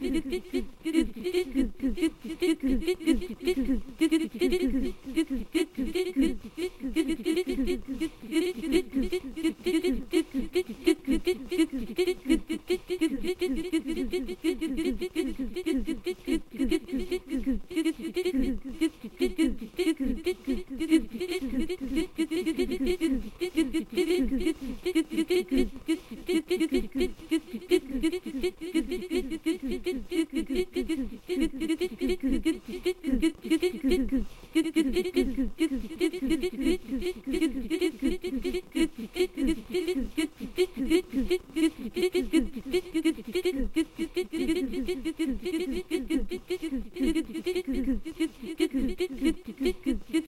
hyperprocessed elena sennheiser vocal